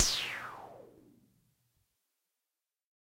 EH CRASH DRUM64
electro harmonix crash drum
harmonix, crash, electro, drum